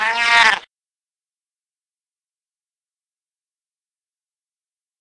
Siamese cat meow 7
animals, cat, meow, siamese